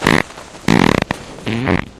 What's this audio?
fart poot gas flatulence flatulation explosion noise weird